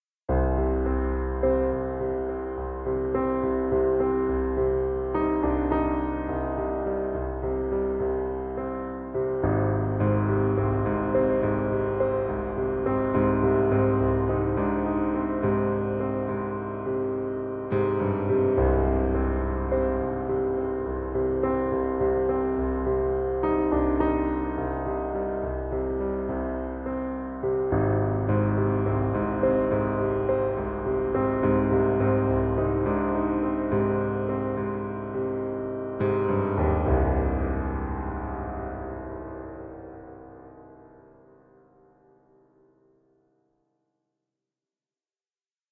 Created from sampled piano notes in music production software.